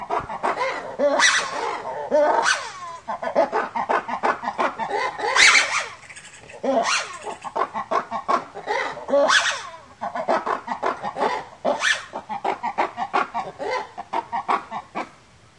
black and white ruffed lemur01
Ruffed lemurs calling, recorded with a Zoom H2.
field-recording, lemur, madagascar, monkey, primate, zoo